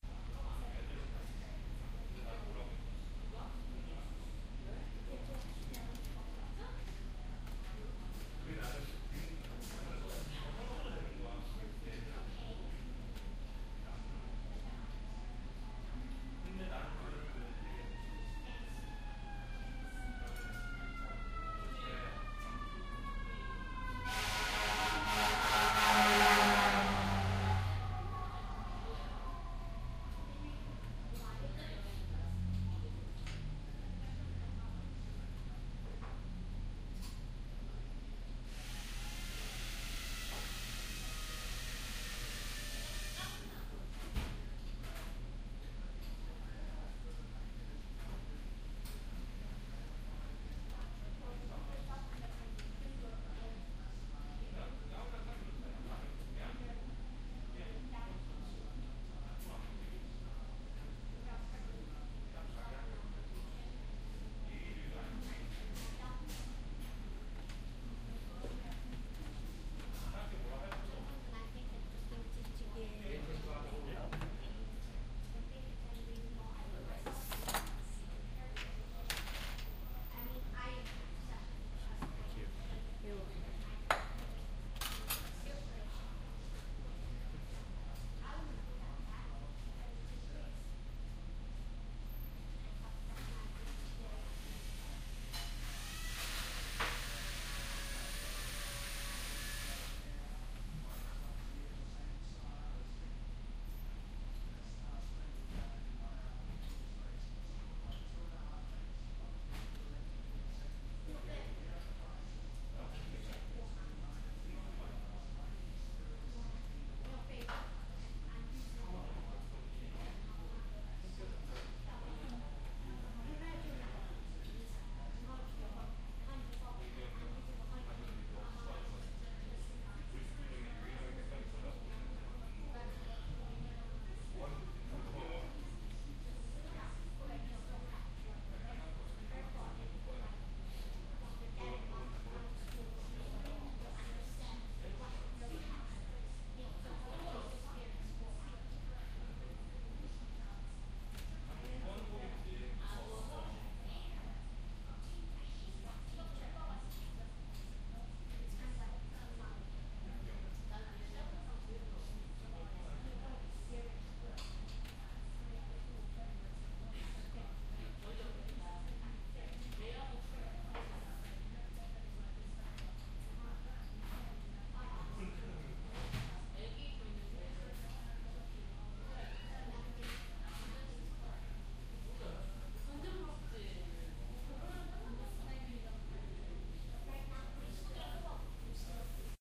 Stereo binaural recording of a small, quiet restaurant. A fire engine goes by outside at the very beginning, and there's a bit of renovation work going on in the back. Some low tv sounds, very little conversation.